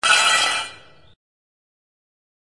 This sound are taken at Hahn, Germany in may 2013. All the sound were recorded with a zoom Q3. We have beat, scrap and throw everything we have find inside this big hangars.